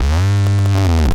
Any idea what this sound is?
Mute Synth LowPitch 004
Could be an engine or mechanical sound, but is another sample from the Mute-Synth.
Mute-Synth, electronic, square-wave, mechanical, engine